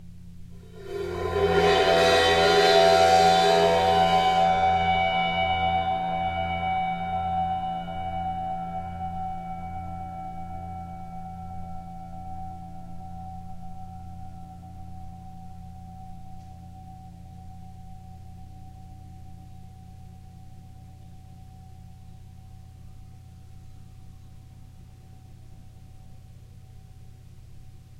Cymbal Swell 108
bowed cymbal swells
Sabian 22" ride
clips are cut from track with no fade-in/out.
ambiance, ambient, atmosphere, bowed-cymbal, overtones, Sabian, soundscape